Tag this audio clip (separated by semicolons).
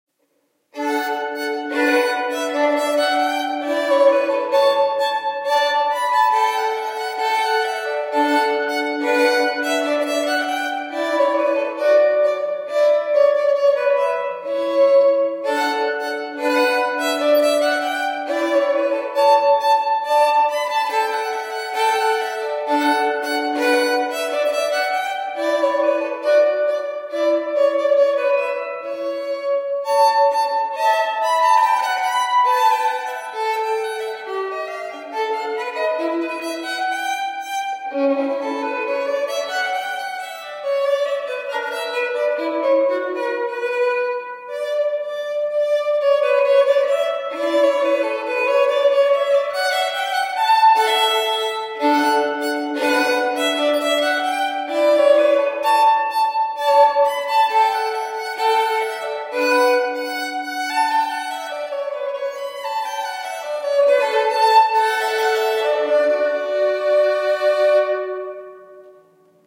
Baroque; Reverb; Violin; Prelude